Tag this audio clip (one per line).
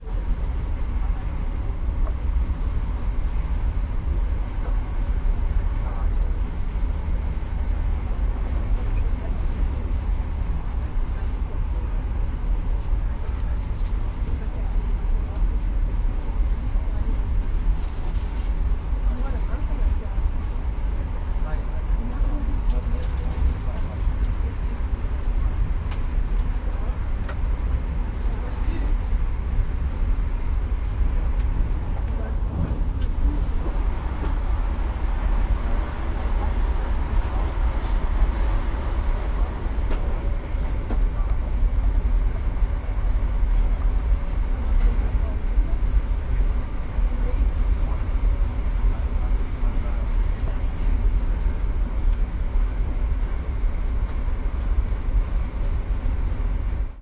ambience
background-sound
train
ambiance
atmosphere
atmos